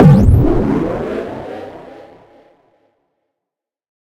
A synthesized high tech warp drive sound to be used in sci-fi games. Useful for when a spaceship is initiating faster than light travel.